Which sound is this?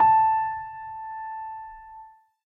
Simple sound of the key A4
A-4, La-4, Piano